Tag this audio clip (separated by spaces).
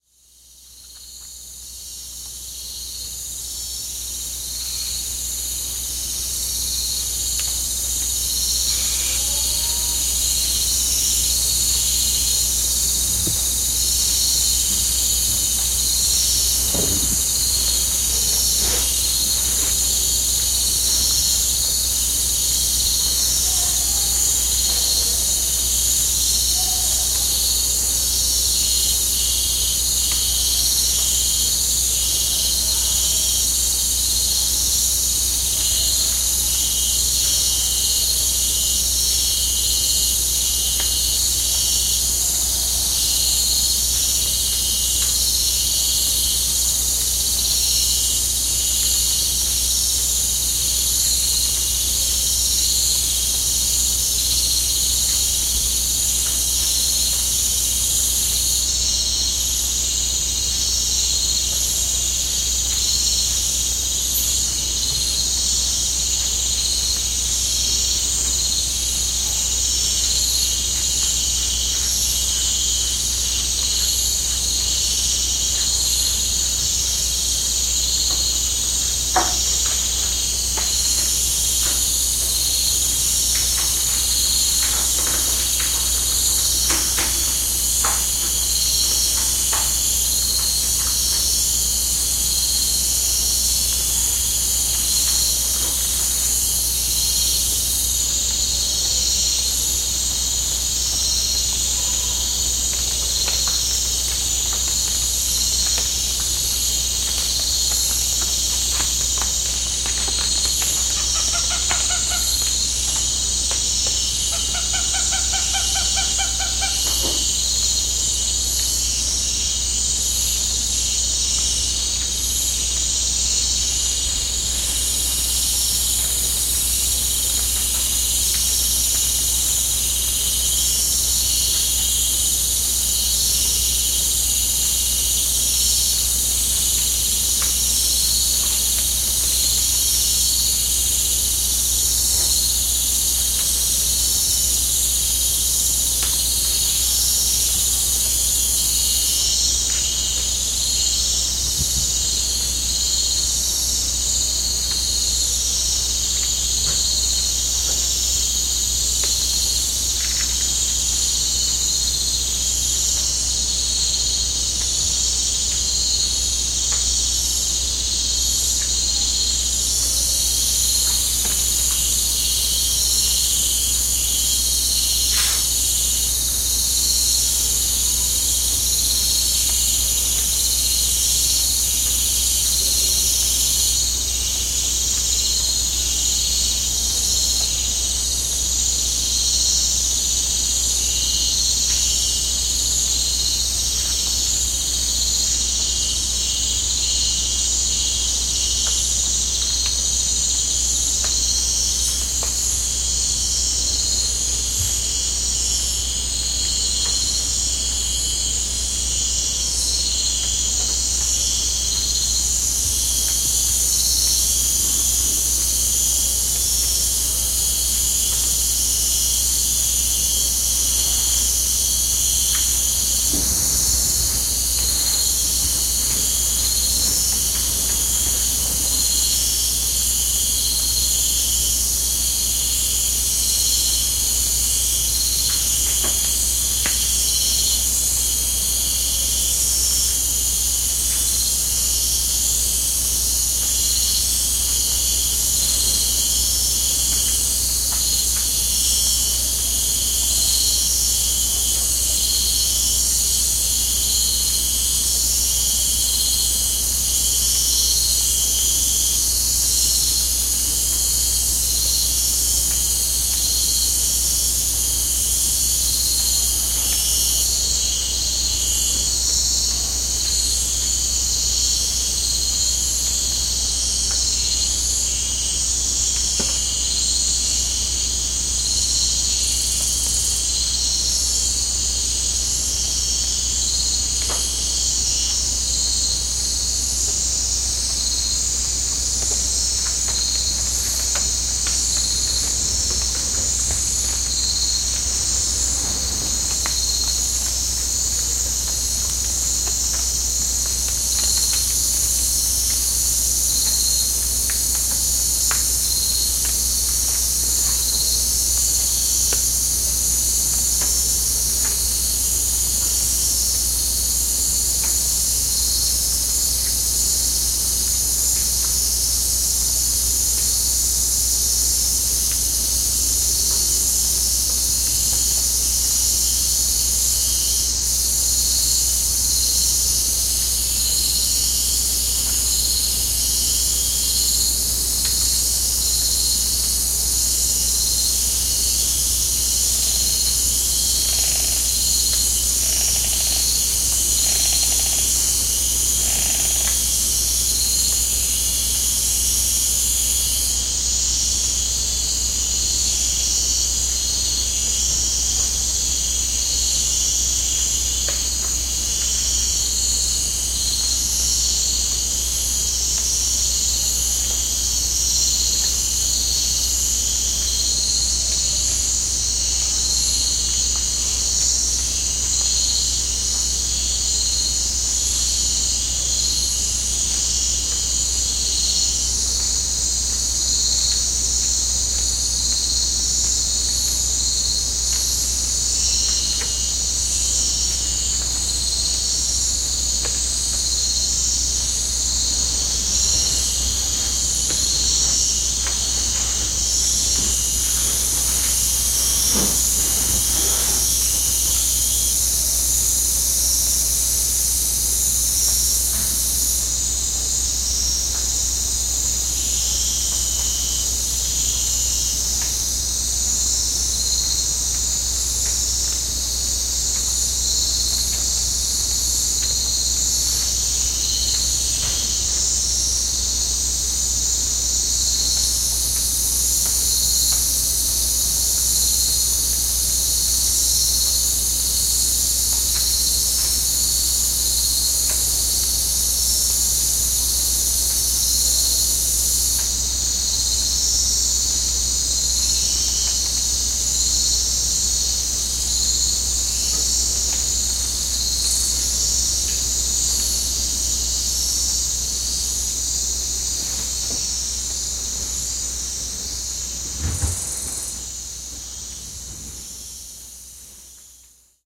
ambient Belize bird-call birds bugs Central-America cicada crickets field-recording forest insects jungle Maya monkey national-park nature nature-sounds night nighttime peaceful rain rainforest sounds trees tropical tropics